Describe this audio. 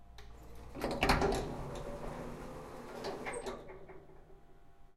Elevator door closing, some ambience from outside the building.
Recorded via Tascam Dr-100mk2.